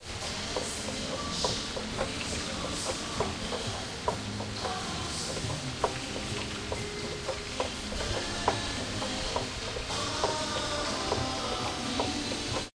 movie courtyard escalater
A trip to the movies recorded with DS-40 and edited with Wavosaur. The escalator outside the theater before the movie.
field-recording, outside, escalator, ambience